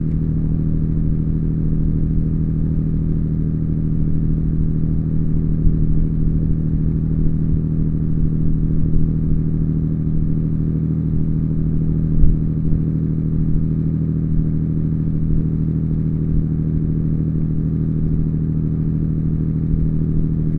Diesel engine 1
Stationary diesel engine on a ferry boat.
fieldrecording, ship, diesel, boat, stationary, field-recording, recording, Field